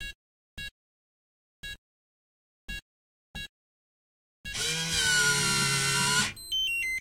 I recorded this safe in my hotel room in Italy using an ipod touch 3G with blue mikey 2 and FiRe 2 recording app.